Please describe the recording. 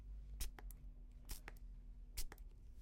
SPRAY PERFUME
Es el sonar del spray de un perfume
fragrance, spray